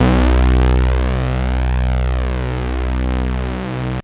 A single note played on a Minibrute synthesizer.
Synthesizer, Minibrute, Samples